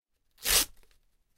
tearing paper 06
This is a sample from my sample pack "tearing a piece of paper".
break, magazine, newspaper, tearing-apart